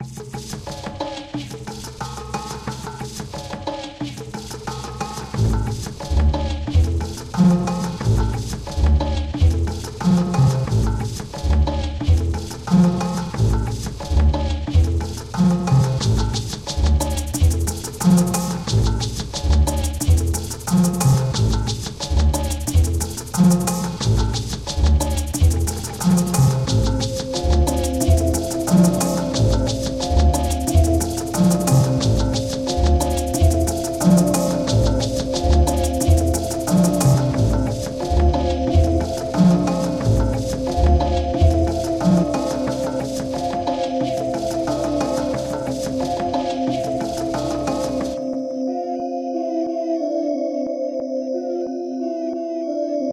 a sample with a african rythm combined with a cello and synthesize notes. Made with Ableton